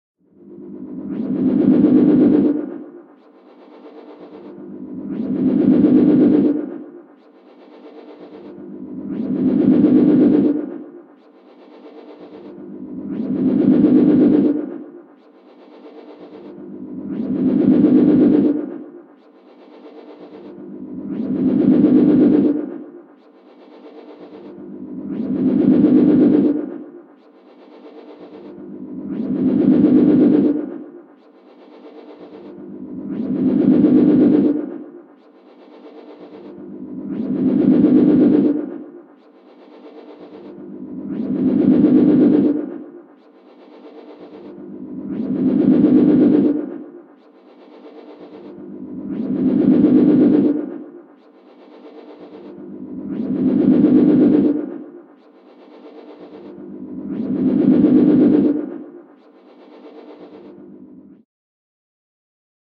weird feedback loop
A weird ambient loop I made with echoes and feedback through a mixing desk.
ambient, feedback, drone, atmosphere, texture